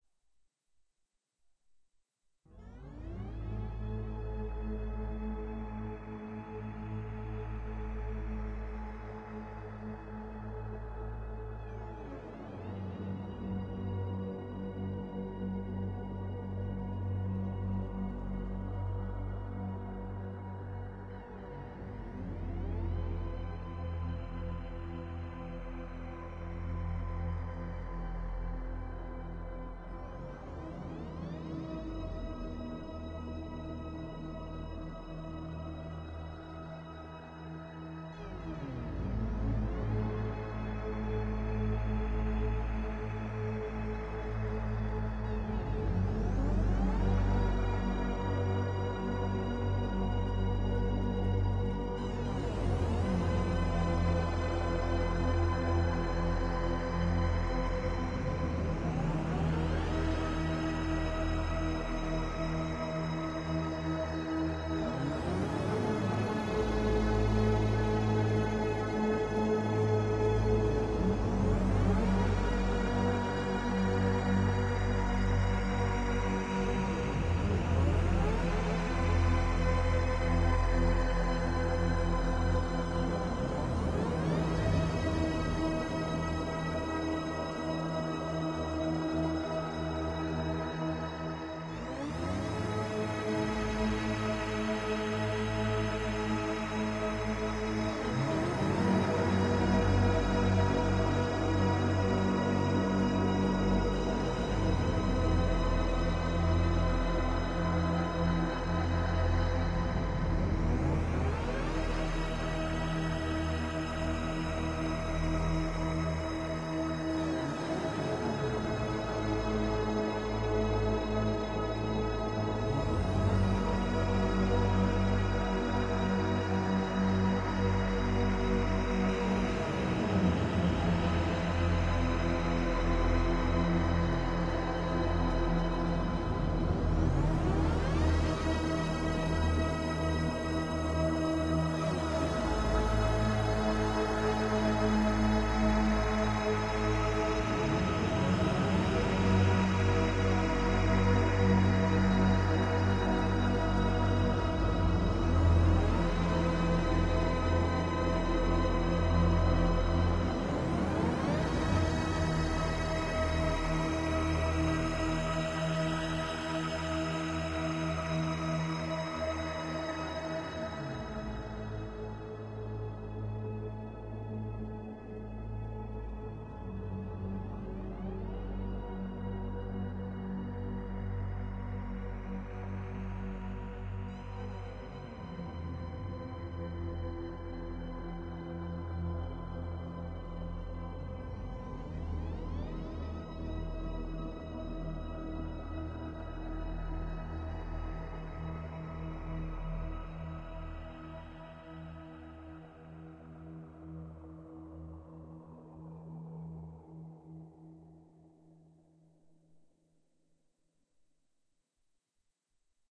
Epic music created for various purposes. Created with a synthesizer, recorded with MagiX studio and edited with audacity.